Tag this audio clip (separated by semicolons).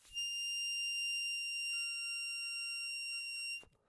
f; harmonica; key